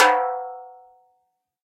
TT08x08-MLP-RS-v07
A 1-shot sample taken of an 8-inch diameter, 8-inch deep tomtom, recorded with an Equitek E100 close-mic and two Peavey electret condenser microphones in an XY pair. The drum was fitted with a Remo coated ambassador head on top and a Remo clear diplomat head on bottom.
Notes for samples in this pack:
Tuning:
VLP = Very Low Pitch
LP = Low Pitch
MLP = Medium-Low Pitch
MP = Medium Pitch
MHP = Medium-High Pitch
HP = High Pitch
VHP = Very High Pitch
Playing style:
Hd = Head Strike
RS = Rimshot (Simultaneous head and rim) Strike
Rm = Rim Strike
drum, 1-shot, velocity, multisample, tom